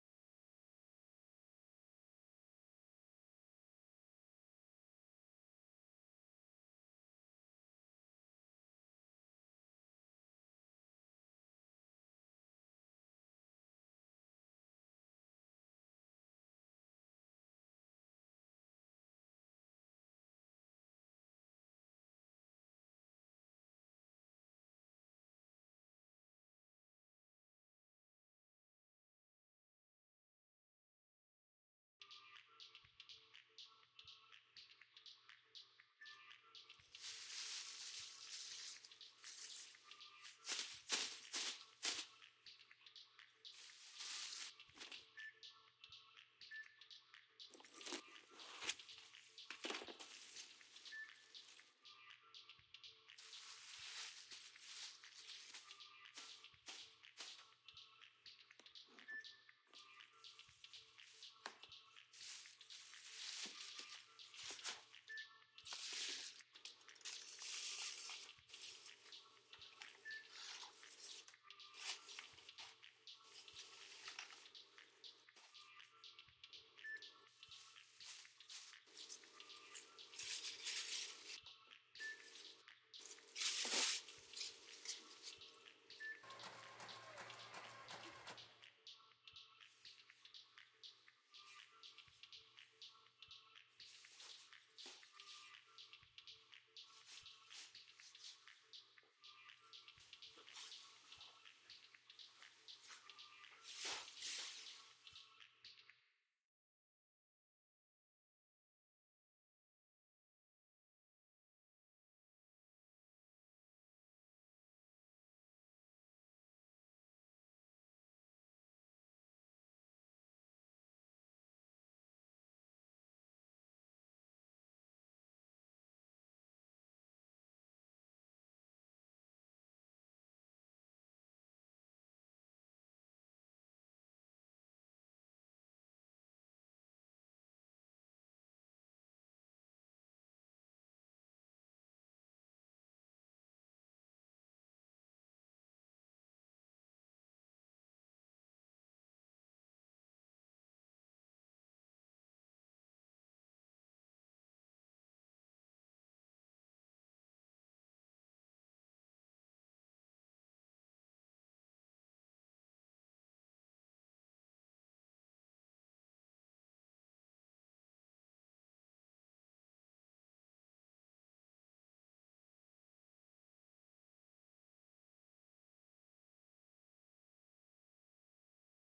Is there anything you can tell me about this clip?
Awkward grocery shopping
Shopping, Register, Bags, Urban, Shop, Cash, Ambient, Grocery, Plastic